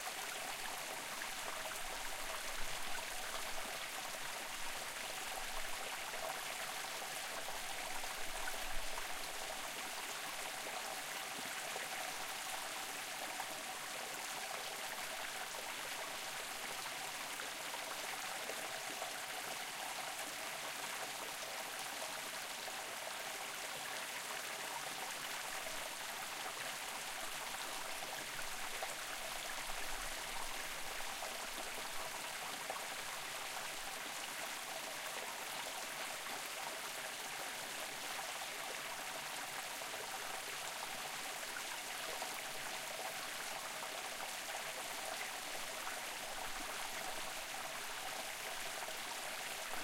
Small flowing river in a woods. Recorded in May 2020 in Sardinia, Olzai.
A river that flowing surrounded by greenery between small rocks.
Lastly, if you appreciate my work and want to support me, you can do it here:
Buy Me A Coffee
relaxing, flow, ambience, flowing, wild, nature, birds, river, ambient, field-recording, forest, stream, liquid, brook, woods, water, trickle, creek